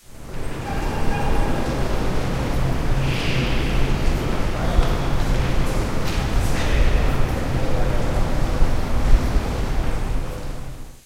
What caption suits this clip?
Background noise while changing hall.